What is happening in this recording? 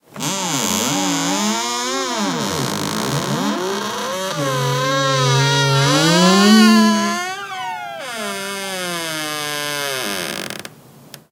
container hinge 02
Hinge of a large shipping container